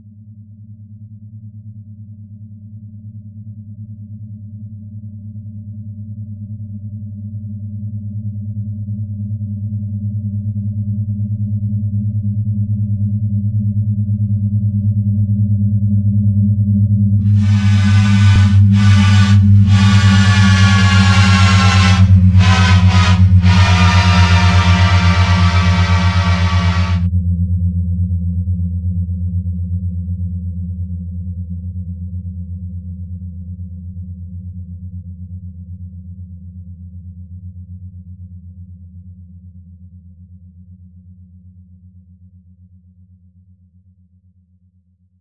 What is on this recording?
Improvment. I added a horn and and used doppler to get an image of a train that nears, passes and fades out. What do you think. I am not satisfied yet, far from. I'll go on with improvments.
diesel, locomotive, railway